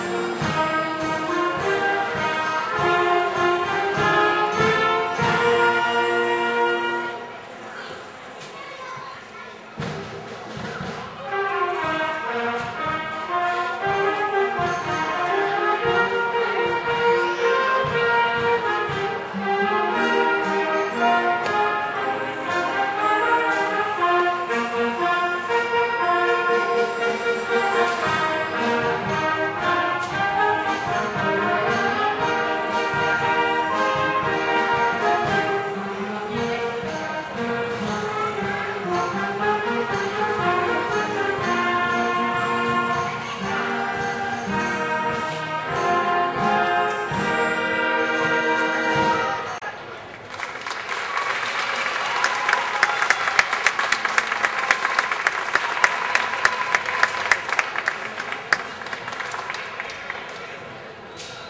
A youth orchestra at a fiesta in a small town east of Madrid. That's my
niece on saxophone ;-}. Recorded with the built-in stereo mic of a mini-DV camcorder.